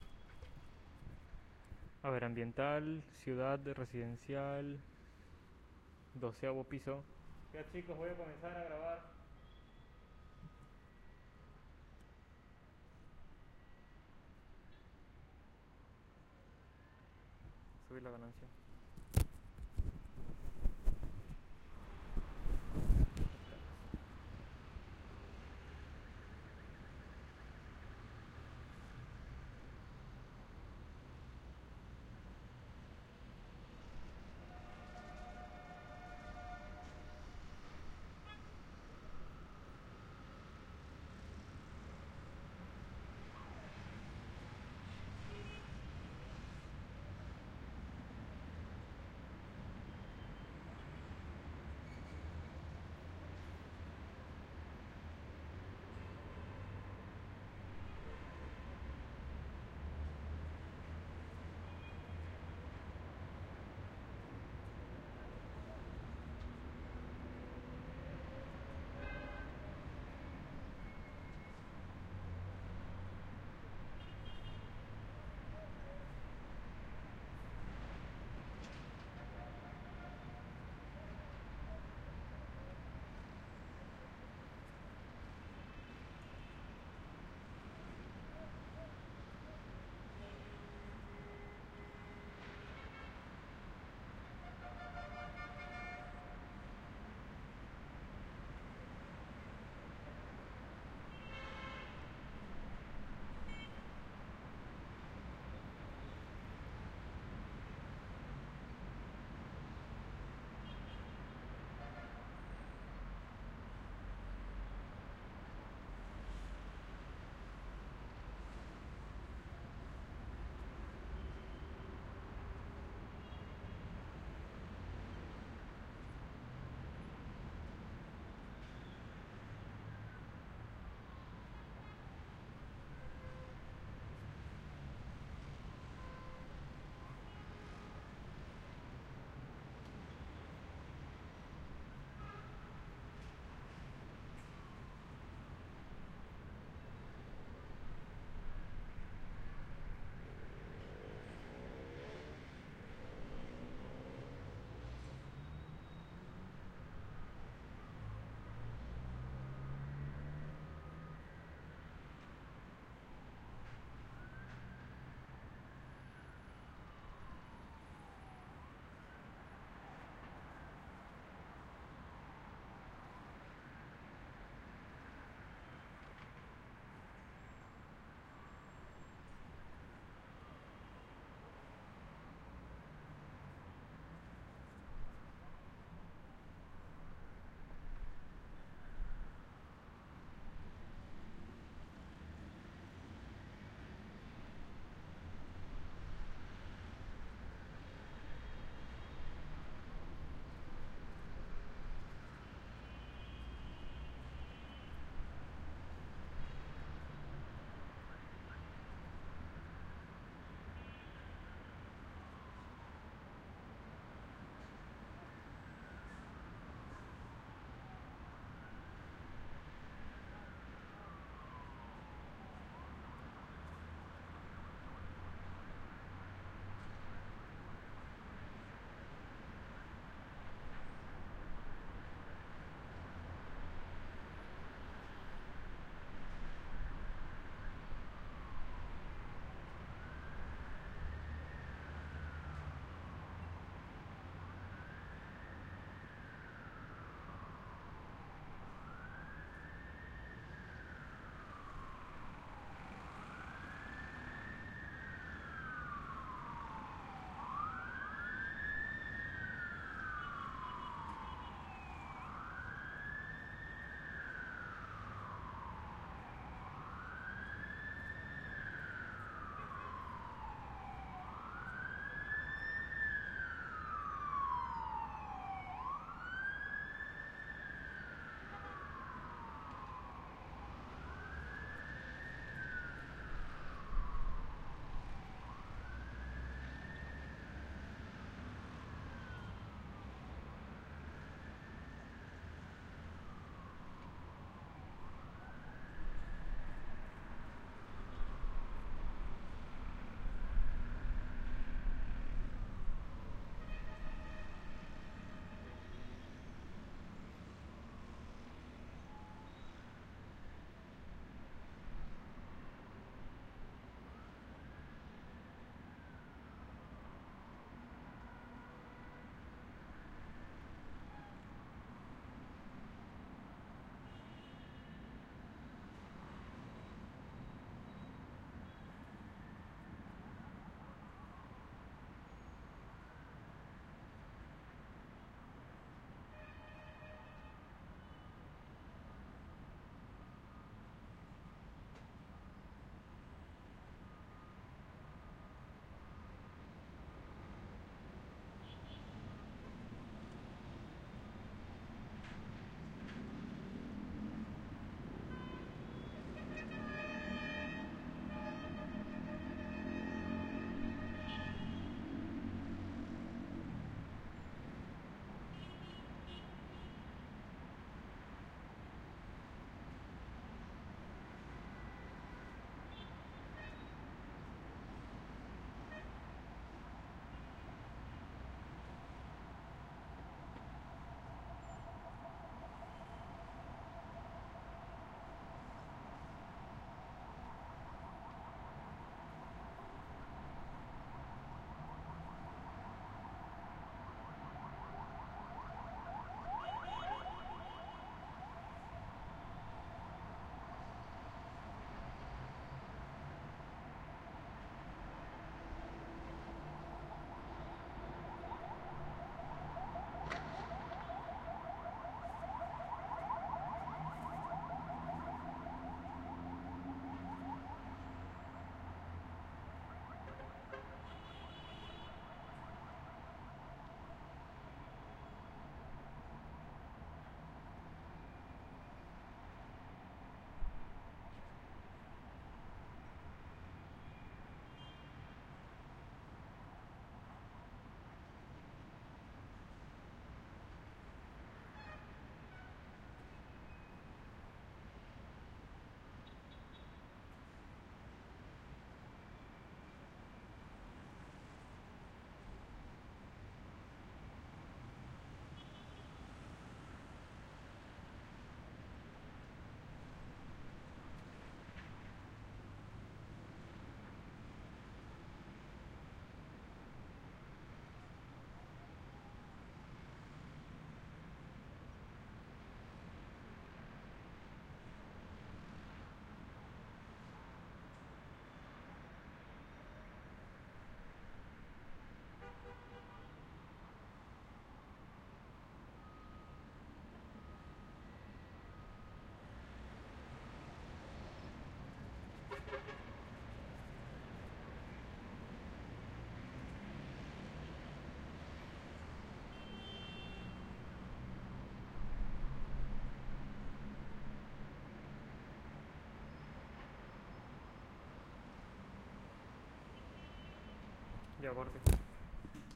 Ambience of city at night from the window